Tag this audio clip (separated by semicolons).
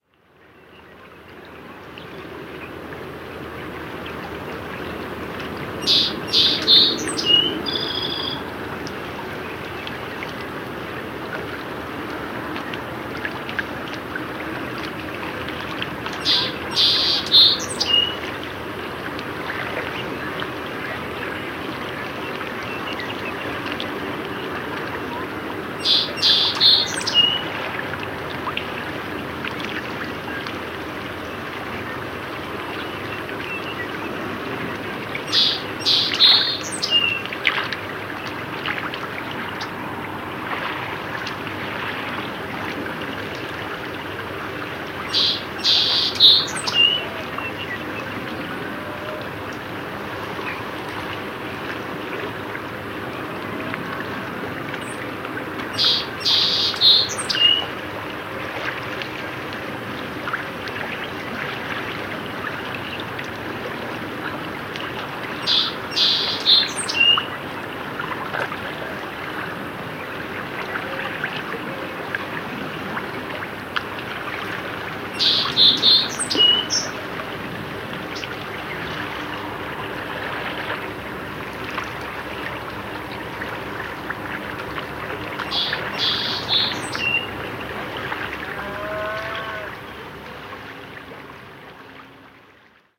melospiza-melodia; sherman-island